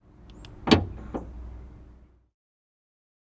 unlocking Car
car, vehicle